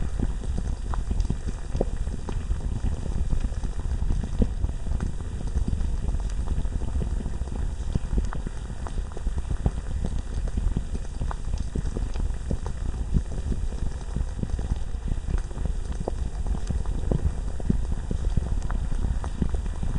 Lava Loop 2
A slowed down and heavily edited recording of a chemical boiling I recorded in my chemistry class. Sounds like a medium-sized volume of lava. Loops perfectly.
Recorded with a Zoom H4n Pro on 08/05/2019.
Edited in Audacity.